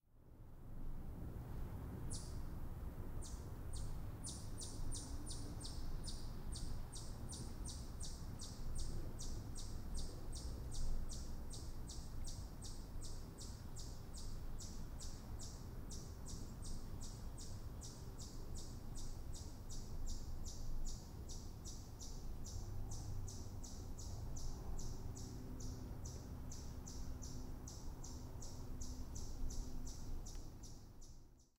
Ext-amb forest late-fall single-bird-tweet
recorded in sparse forest late fall in the evening. Birds echoing tweets in forest.
ambience, bird, ext, fall, forest, late, tweet